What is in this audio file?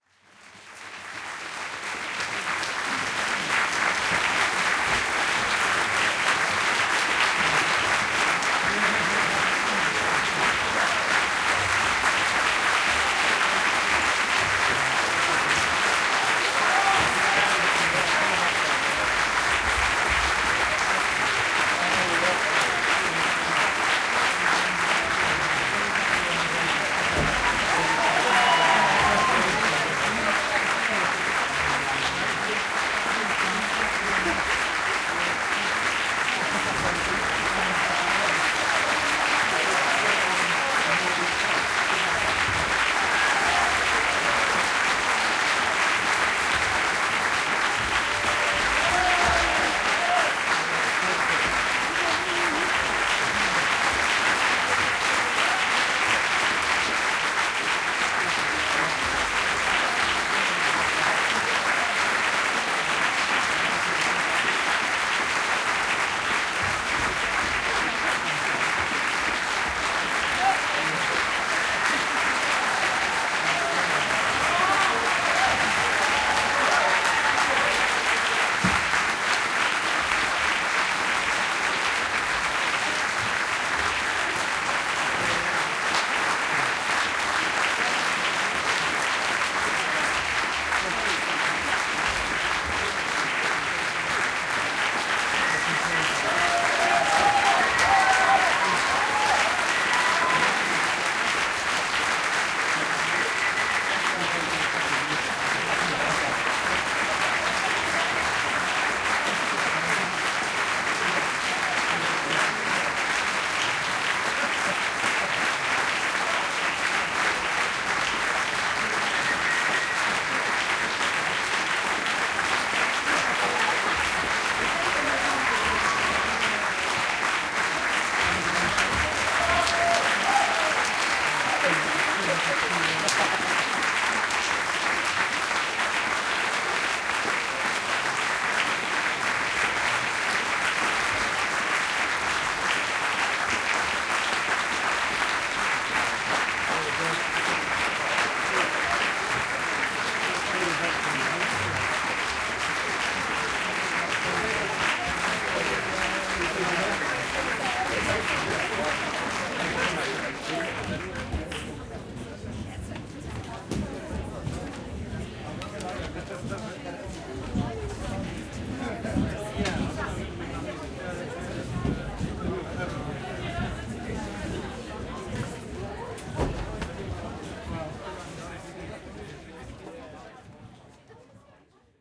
Orchestra Pit Perspective End Applause
These are a collection of sounds I took from a recent opera recording. For obvious reasons I could not upload any of the actual performance but I have here some recordings of tuning and audience from the microphone placed in the pit.
With placements limited and under instruction not to have any visible, I had to place these fairly discreetly without suspending the mic's. I used two omni DPA 4090 as a spaced pair around 3 foot above the conductor, and an AKG 414 on a cardioid pickup to the rear.
applause, audience, locationsound, orchestra, theatre